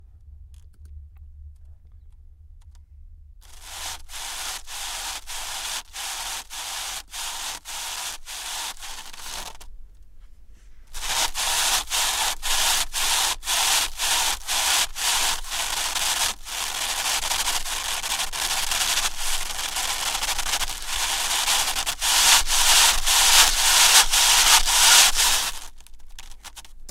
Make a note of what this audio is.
Foam polymer packaging creaks. Record chain: Neumann u87i -> Universal Audio LA-610 mkII -> Universal Audio Apollo 8p
studio-recording, polymer, crunch, creaking, foam, creak, scratch